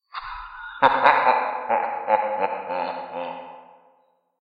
I was laughing at a funny video, so I recorded this while watching the video with a CA desktop microphone. I added some reverb, made it echo, and lowered the pitch, all in Audacity. So my high-pitched laughter, to change in to a villian's evil laughter. Perfect for movies or games.